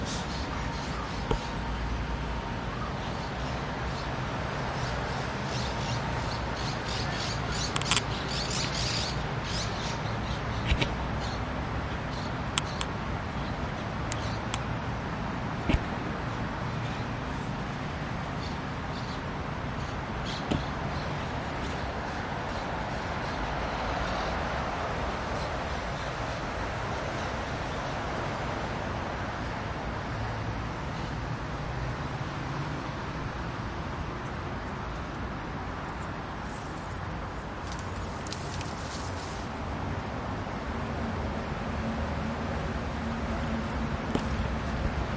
20211010 RiuBesòsCarrerVilella Traffic Birds Noisy Complex
Urban Ambience Recording at Besòs River by Ronda Litoral, at the Vilella Street crossing, Barcelona, October 2021. Using a Zoom H-1 Recorder.
Traffic, Complex, Birds, Noisy